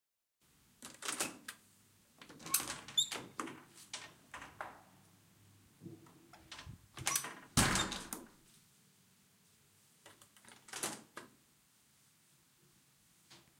Door open and close
close, Door, open